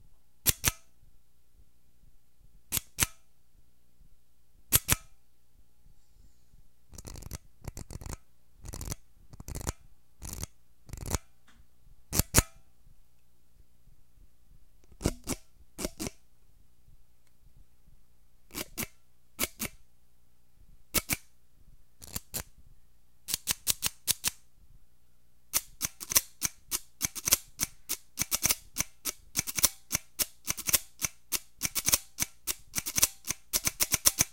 Sounds from a tool used to make balls of ice cream. // Sonidos de un cacharro para hacer bolas de helado.
flickr, noise, ice-cream, tool